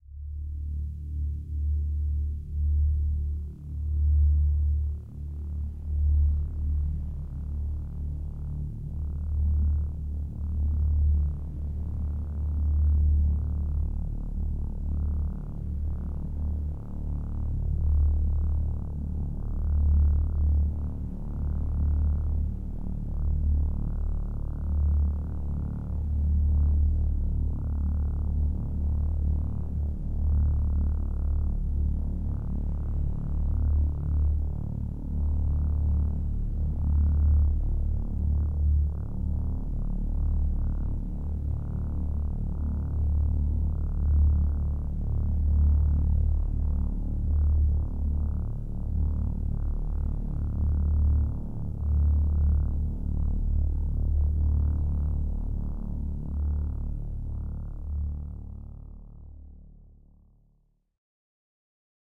This drone is pitched in the key of D. It has a dark, burbling tone and a hint of wind/sea noise. It was recorded in Reaper using Synclavier V and Modular V software instruments by Arturia.
Ambient, Atmosphere, Horror, Scifi